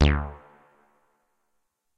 MOOG BASS SPACE ECHO D
moog minitaur bass roland space echo